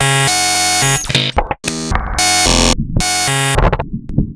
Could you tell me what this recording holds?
Abstract Percussion Loops made from field recorded found sounds